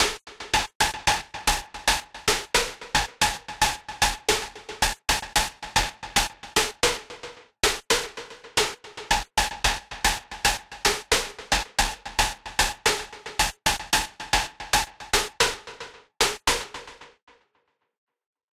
STEAM DRUM LOOP
cumbia, loop, bpm, percussive, moombahton, drum, melody, steam, 112